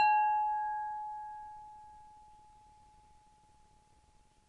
Sch 05 weich
Soft kitchen bowl